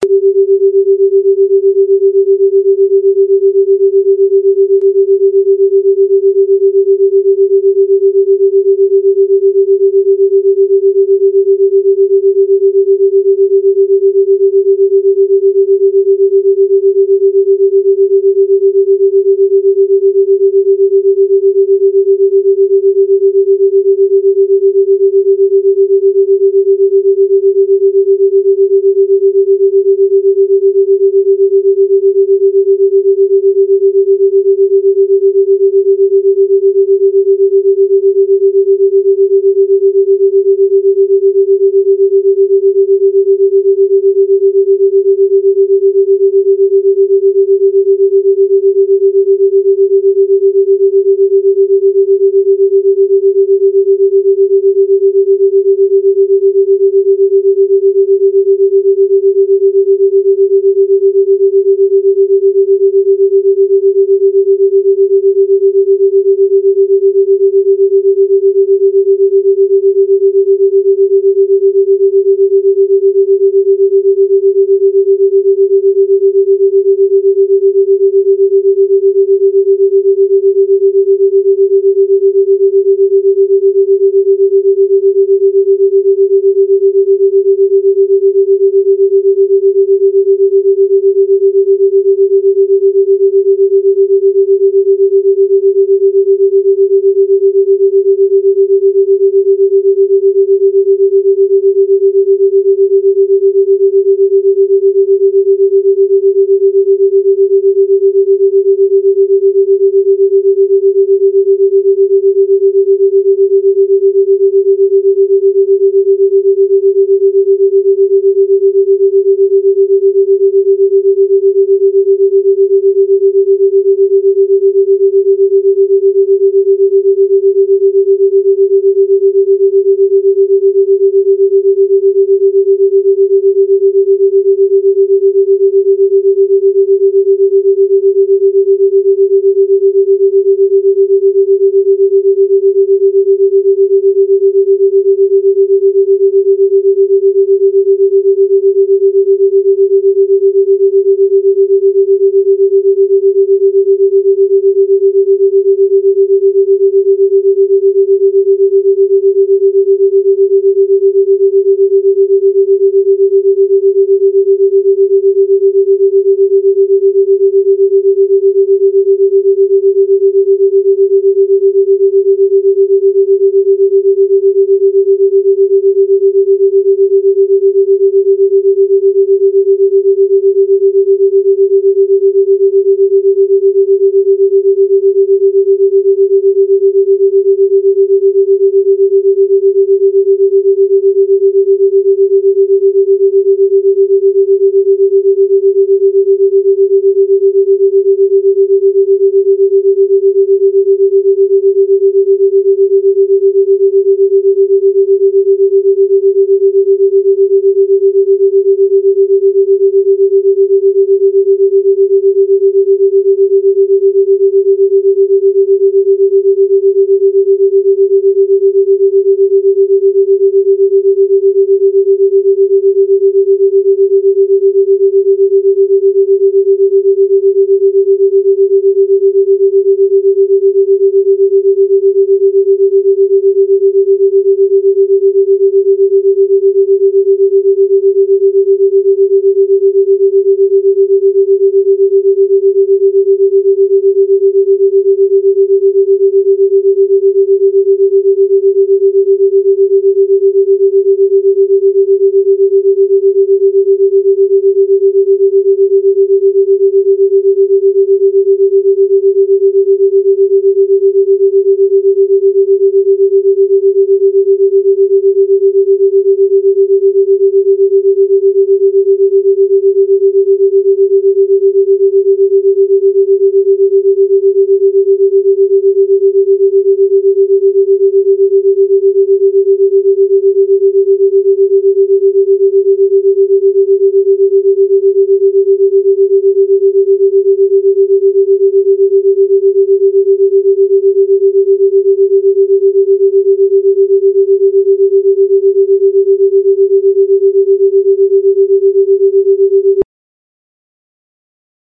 Track five of a custom session created with shareware and cool edit 96. These binaural beat encoded tracks gradually take you from a relaxing modes into creative thought and other targeted cycles. Binaural beats are the slight differences in frequencies that simulate the frequencies outside of our hearing range creating synchronization of the two hemispheres of the human brain. Should be listened to on headphones or it won't work.